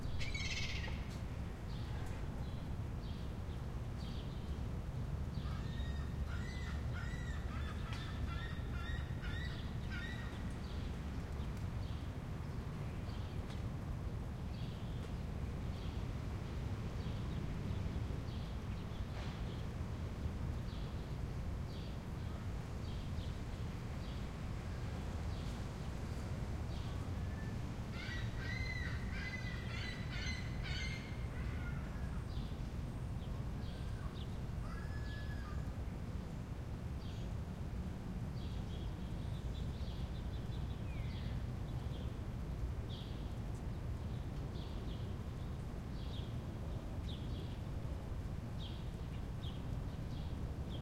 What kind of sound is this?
park city early morning quiet birds echo ventilation hum Montreal, Canada
birds, morning, hum, echo, ventilation, Montreal, city, quiet, Canada, early, park